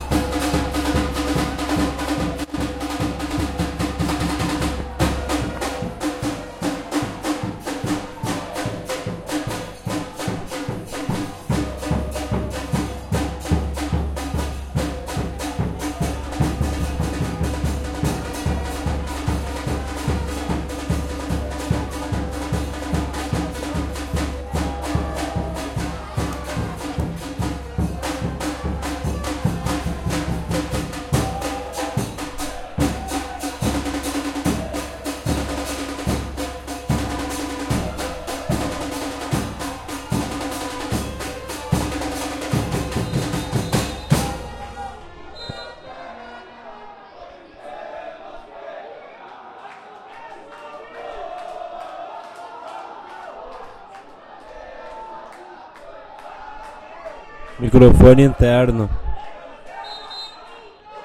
Radio Talk - Stadium - Recording - Soccer - Ambience